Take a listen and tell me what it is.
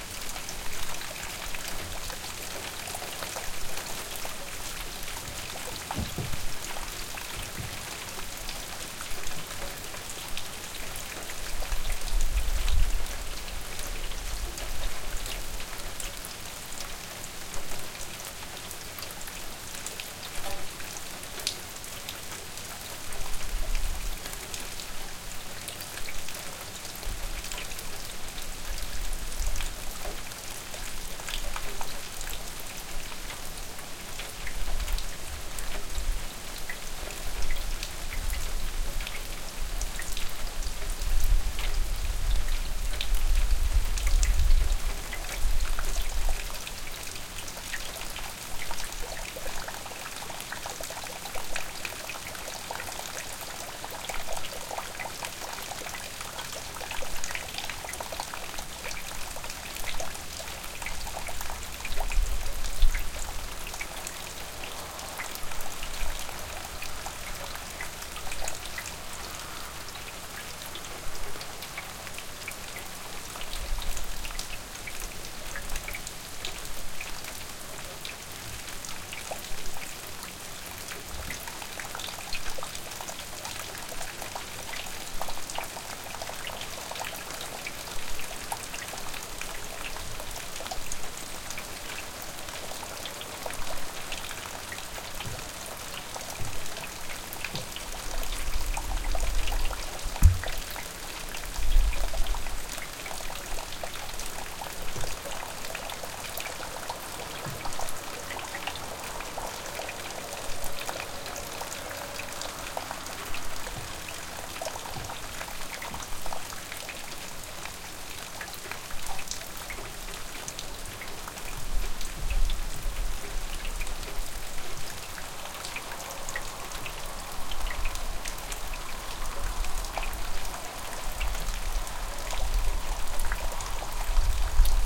Rain, dripping water
Dripping water with some rain in the background